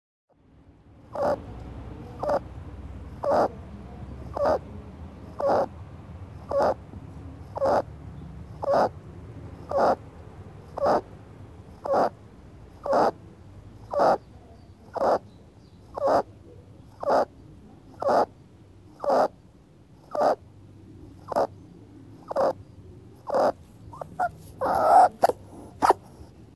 Rabbit Squeaking and Sneezing
One of my pet rabbits who has a bit trouble breathing sometimes and sounds like a squaky toy or guinea pig :) At the end, he sneezed a little